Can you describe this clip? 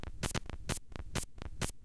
microkit.1-example
short, microkit, nifty